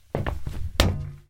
Jump Metal 3
Single jump on metal sample.